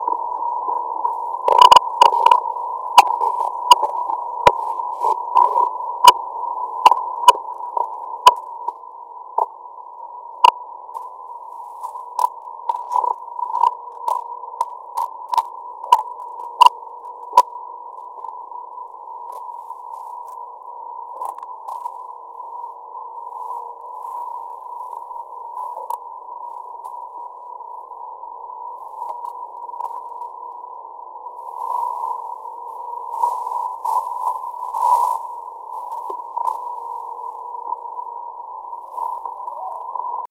Sticks EQ
Blips of radar with switches and buttons being pressed
switches, server-room, radar